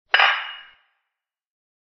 Reverb sounding metallic hit created by hitting a metal fork and spoon together
Microphone: Audio Technica ATR 2100
Software: FL Studio 10 to slow the audio down
anvil, hit, industrial, iron, metal, metallic, percussion, smelting, smithing, sound, weapon